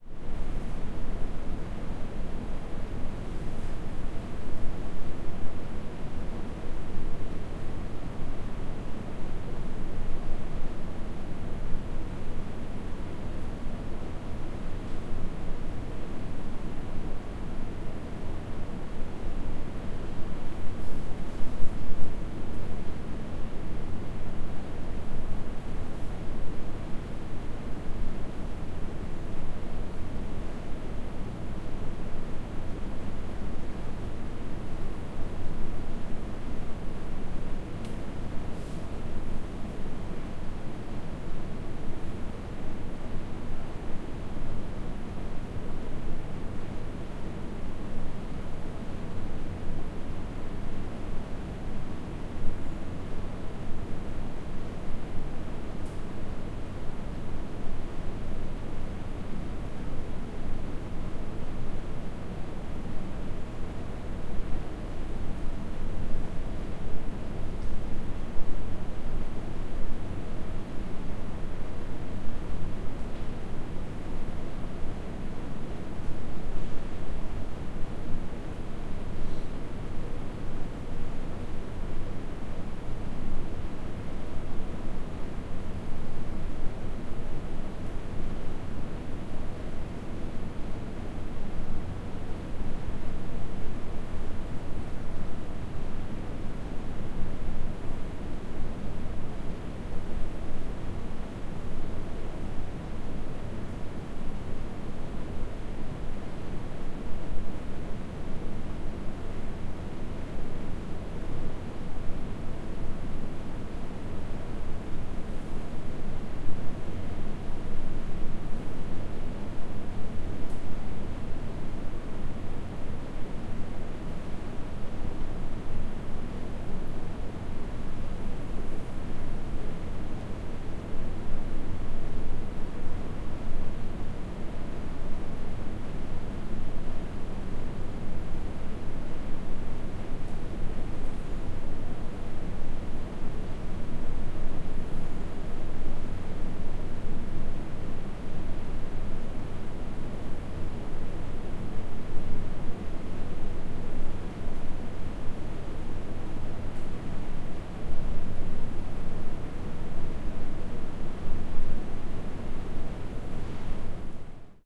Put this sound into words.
Roomtone of a rectangular university classroom in winter with the heating system coming from a vent from the ceiling. It is a continuous low pitched rumble sound.
Atmósfera de un aula de universidad en invierno, con el ruido del aire de la calefacción que viene de un conducto de ventilación de techo. Sonido continuo sin altura definida más bien grave